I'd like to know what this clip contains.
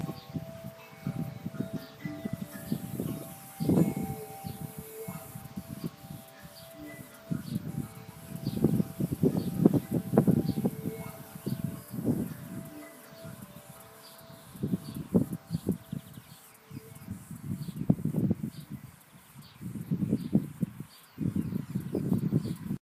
Ambience Wind
Ambient bird and cicada sounds South Pacific Summer.
door, Wind, field-recording, Ambiance